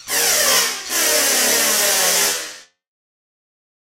pneumatic drill, with flanger